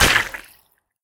Sludge Footstep 3
Footstep, Sludge